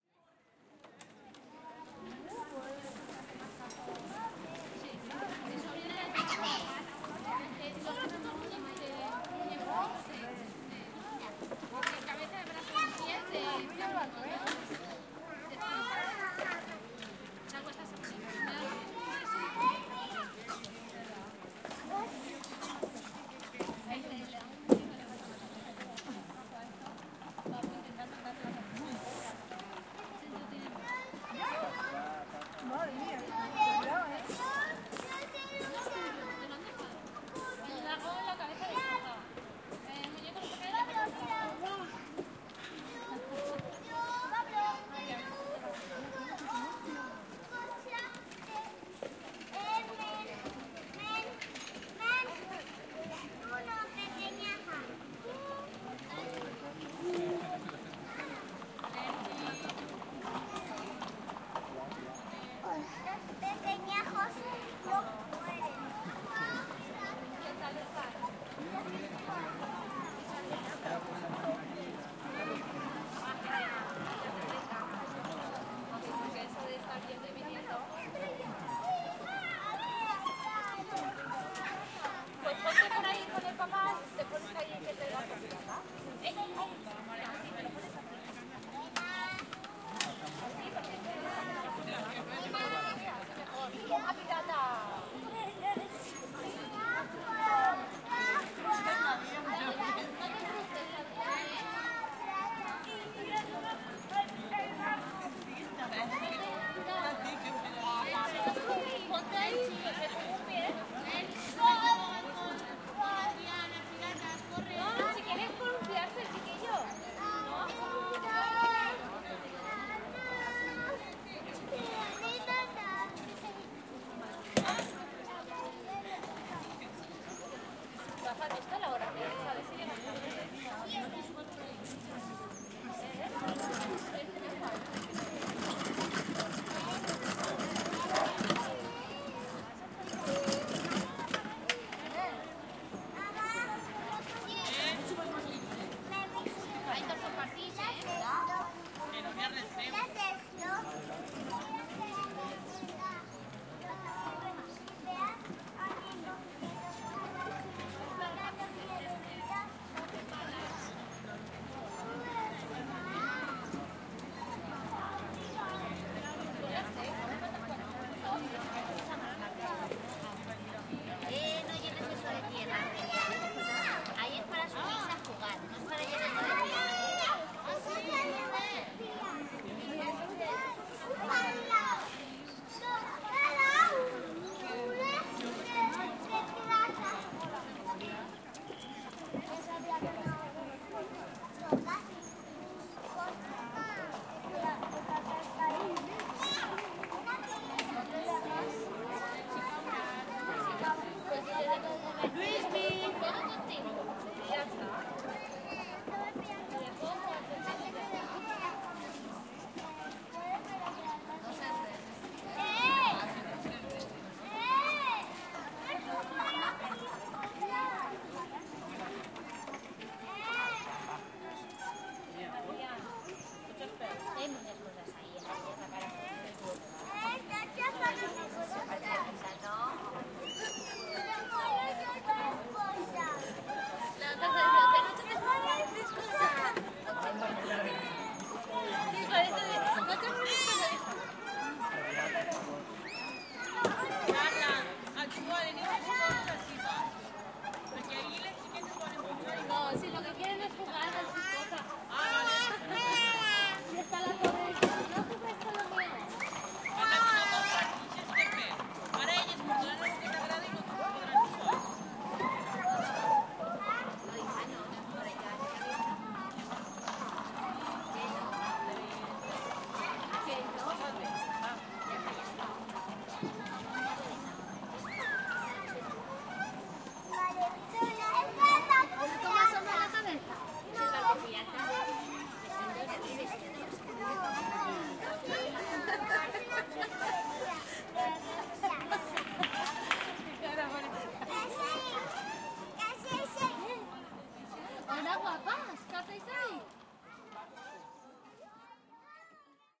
PLAYGROUND GANDIA SPAIN BEHIND GAMES
Recording a small playground with children and their parents in an autumn afternoon in Gandia Spain
Play, Children, Park, Parents, Playing, Spain, Playground, Gandia